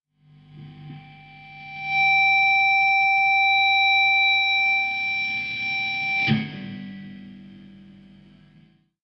Stretched high feedback with abrupt end
Nice even, high-pitched feedback growing from minor harmonics. Ends with a kick of my foot pedal. This sample was generated with a Gibson SG and a VOX AC-30 amplifier. It was recorded using two microphones (a Shure SM-58 and an AKG), one positioned directly in front of the left speaker and the other in front of the right. A substantial amount of bleed was inevitable!
feedback, guitar